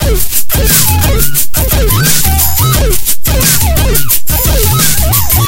Mixed beats with glitchy distorted over tones.
noise, heavy, glitch, beat, slow, processed